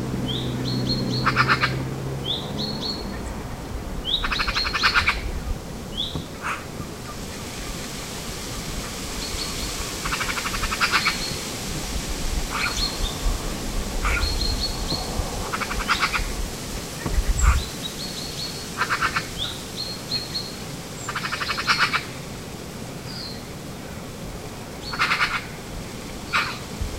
Two magpies calling to each other. Some other birds in background. Also some wind in trees. Recorded with a Zoom H2.
bird, birds, field-recording, forest, leaves, magpie, magpies, morning, norway, trees, wind